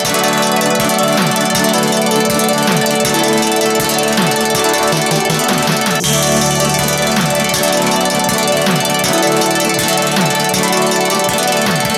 Loop- Ethereal 2
A loop created from one of my constructs (jcg) using Buzz software.
from the same piece as Ethereal_1 but with percussion.
fairy, fantasy, magic, sparkle